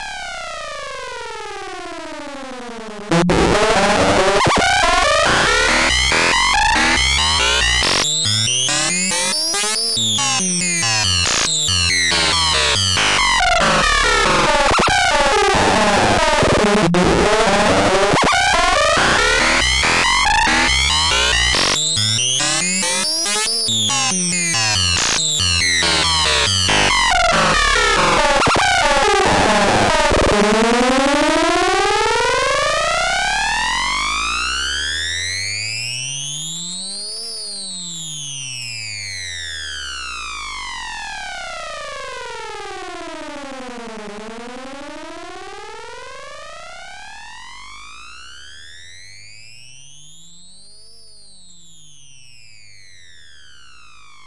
distortion tweaking dark synth mash up random